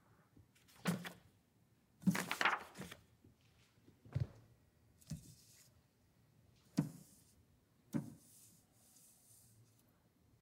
Transparency sheet, plastic sheet handling
Handling a plastic transparency sheet
plastic, transparency, sheet